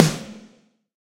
MEB SNARE 001
Heavier real snares phase-matched, layered and processed.
drum, snare